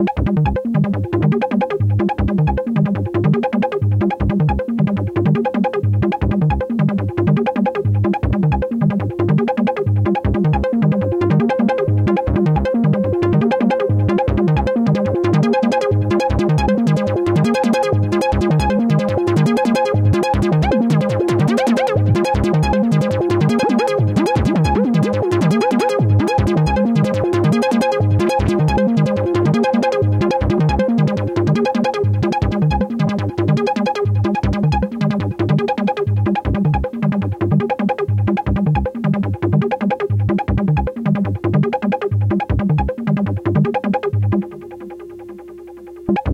DemonSeq PulseLumpers
Square tone pattern being sequenced by the Arduino-based JaxB0x (my own design). The patterns are randomly generated, but repeated in sequence. You choose from difference scales like major, 9th, etc.